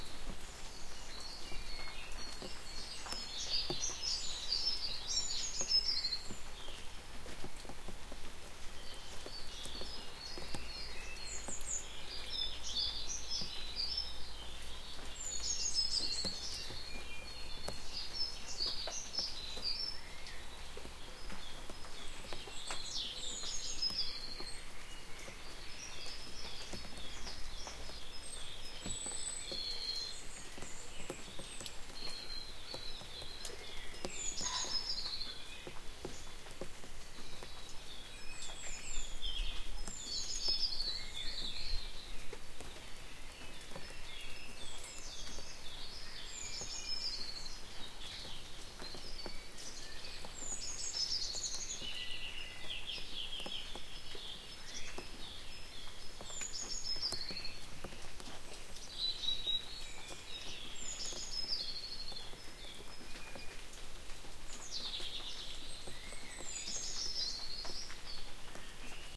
M/S Drizzly Surrey Morning
Mid/Side recording of birdsong on a drizzly Surrey morning. I hate to say it but I just don't want to hear any more lousy field recordings from portable devices with those dreadful 90,120 degree built in mics. If you're truly honest with yourself, they stink of amateur.
ambience, birdsong